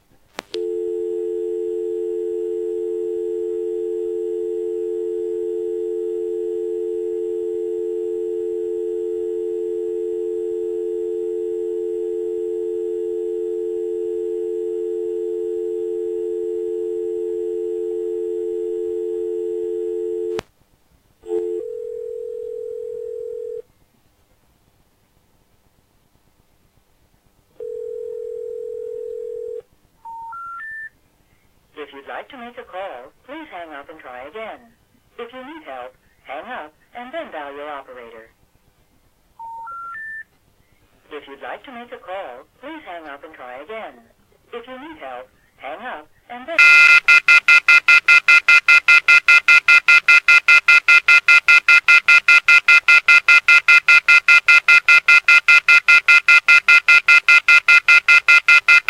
Land line phone sequence starting with dial tone then the infamous "if you'd like to make a call..." followed by the dreaded EENEENEENEENEENEENEEE sound. Recorded with old phone suction cup recorder thing.
dial-tone, land-line, off-the-hook, telephone, us